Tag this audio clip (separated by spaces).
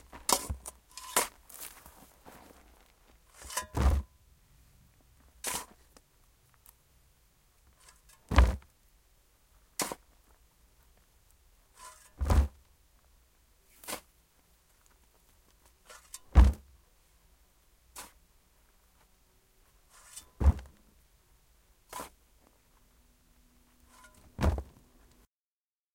dig planting ground digging digging-a-hole dirt spade earth soil hole